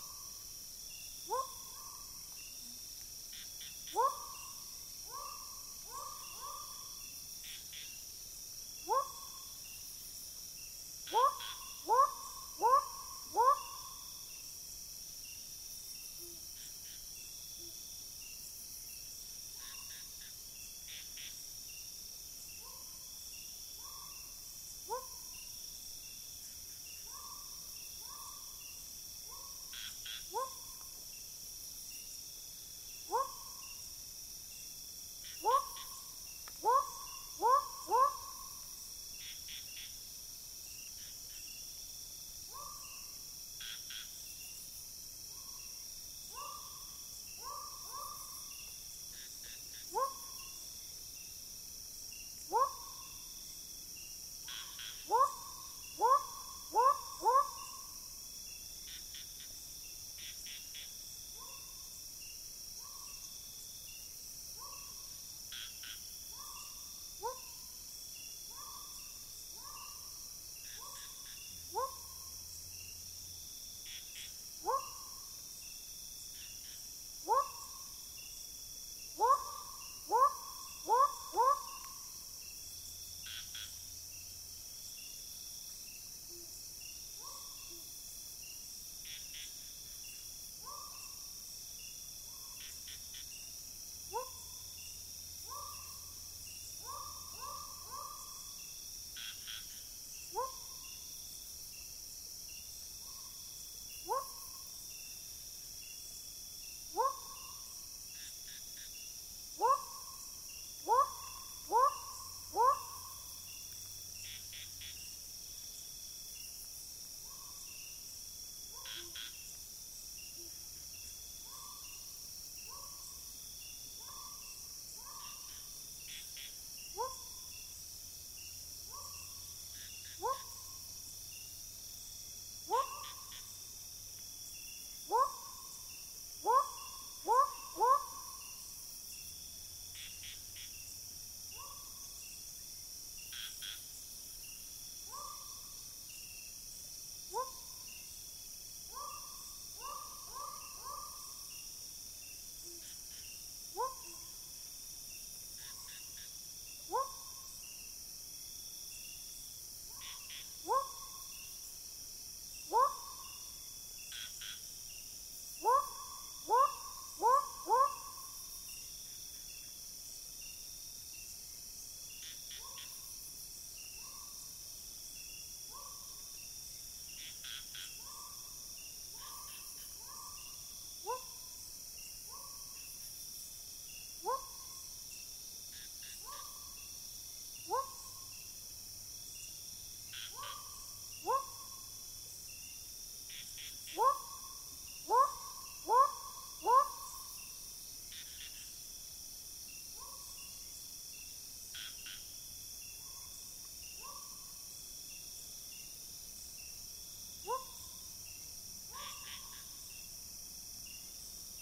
This is recorded using Zoom H6 XY configured microphones with 120-degree directionality on both mics with no stand holding it, so there might have some noise from holding the microphone.
It was recorded in the middle of the night in a windy village area in the mountain called Janda Baik in Pahang, Malaysia.
This is the last of five.